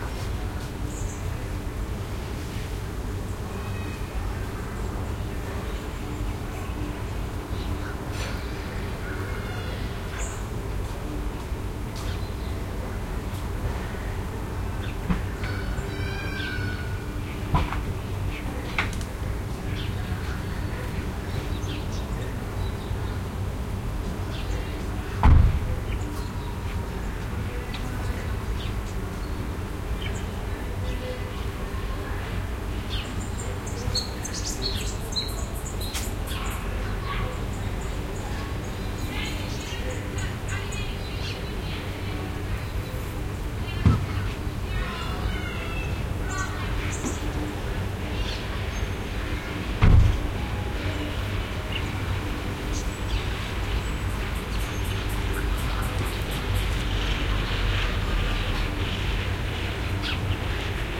Suburban atmos. Wind through trees, bird calls and children in the distance